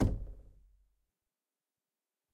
Door Knock - 37
Knocking, tapping, and hitting closed wooden door. Recorded on Zoom ZH1, denoised with iZotope RX.